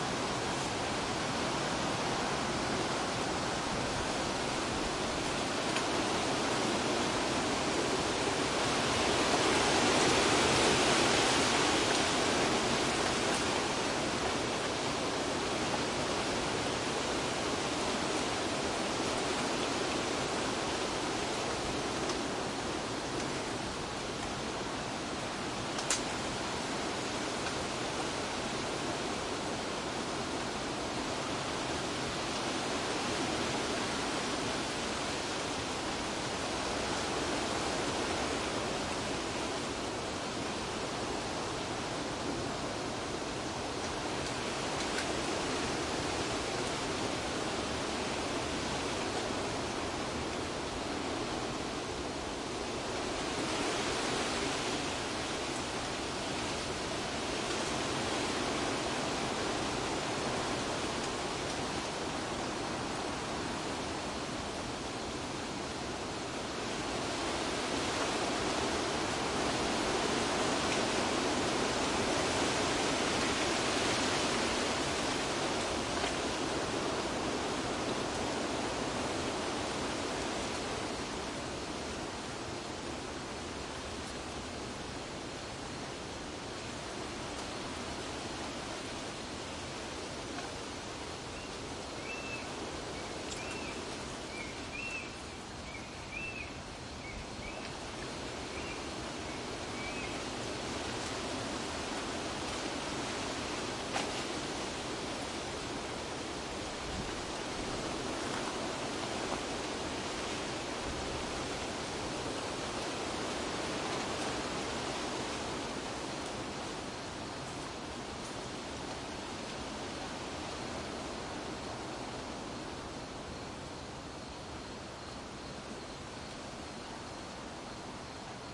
wind strong to medium trees leafy branch snaps and tent flaps night crickets slight echo covered acoustic around tent in backyard under trees
wind, trees, medium, strong, tent, crickets